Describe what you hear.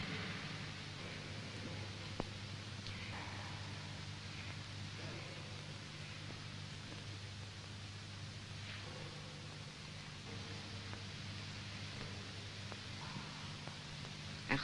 Static noise 40s radio
Radio Noise from the 40s
20, noise, 40, seconds, Static-Radio, old, 40s